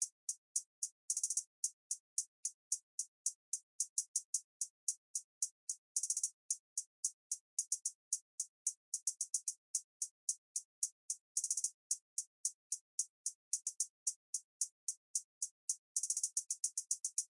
Hi-Hat loop at 111 bpm. Good for hip-hop/rap beats.